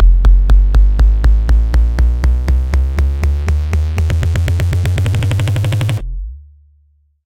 120bpm Kick Build Up
A distorted kick build-up. Created in Reaper with xFer Serum.
bass, bass-drum, bd, beat, buildup, dance, distorted, distortion, drum, drums, electro, hard, kick, kickdrum, loop, percussion, percussion-loop, progression, synth, techno, trance